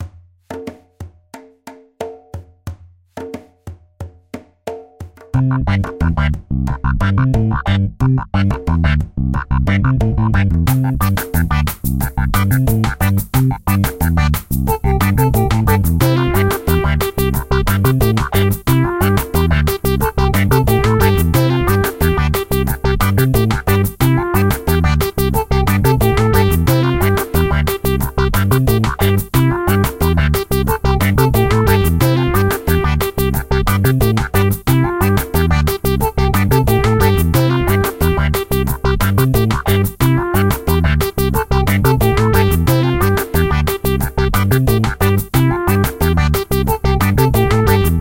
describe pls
reggae sample
reggae sound made whit magix music maker
reggae, magix, maker